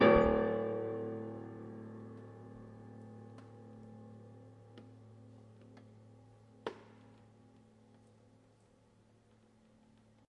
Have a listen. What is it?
A piano key struck recorded inside with a contact mic